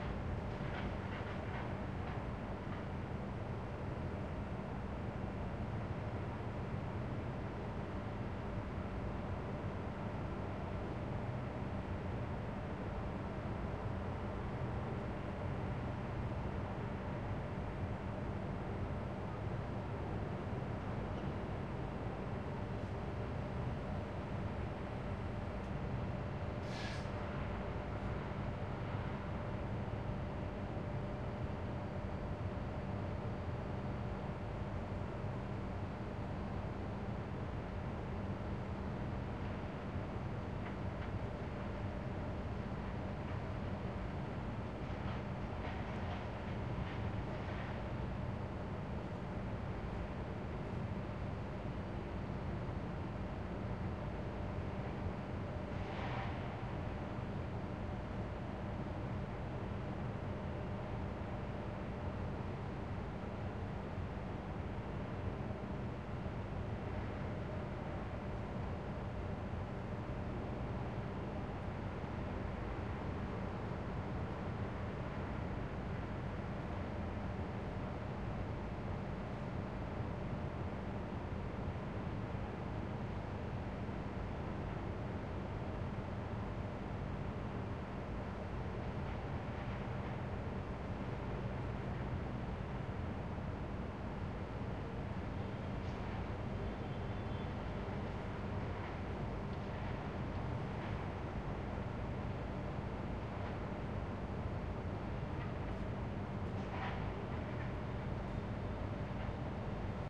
Room Tone Office Industrial Ambience 10
Ambience, Indoors, Industrial, Office, Room, Tone